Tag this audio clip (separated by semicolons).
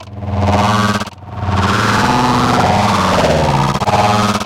granular; loop; synthesis; jillys